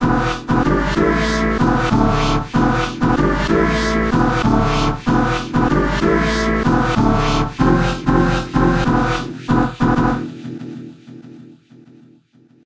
hip hop14 95PBM
background, beat, club, dance, drop, hip-hop, interlude, intro, jingle, loop, mix, move, pattern, rap, sound, stabs